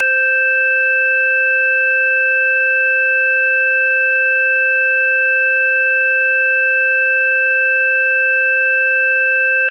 FX HAMMOND M100 FLUTE C
Sample of a C note on a hammond M100 series organ, flute sound from first keyboard, initial click and note held 10 seconds. Recorded directly from a line output With a Tascam DR-40 then Processed with an EQ to remove unwanted noise.